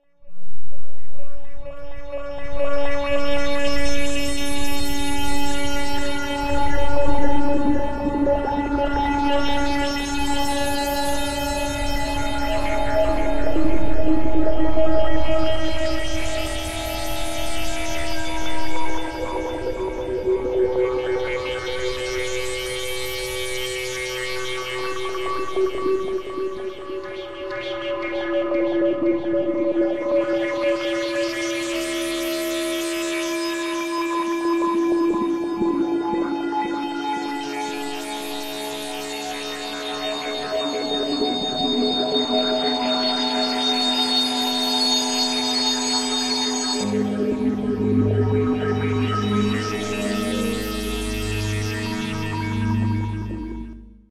Spacial swirl
The sound a white hole would probably make.
Made with Grain Science app, edited with WavePad.
signal, sci-fi, space, cinematic